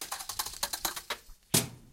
Brush hits and thump
objects, taps, hits, random, variable, brush, scrapes, thumps